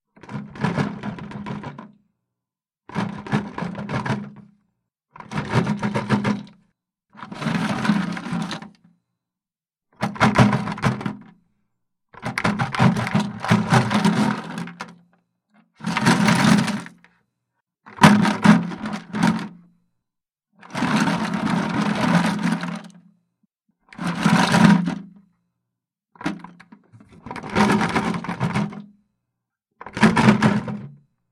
Bucket of Junk Shake
Shaking a plastic 5-gallon bucket of junk. Recorded in treated room with Shure SM78.
clattering, crash, rattling, shake